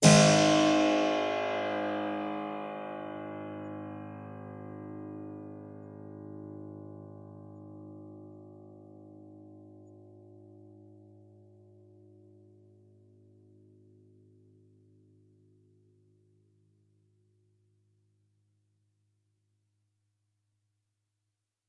Harpsichord recorded with overhead mics
Harpsichord, instrument, stereo